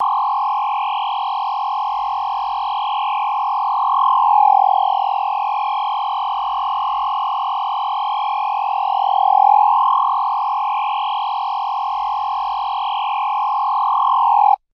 One tone laser beam created using a kaos pad.
beam, fi, laser, sci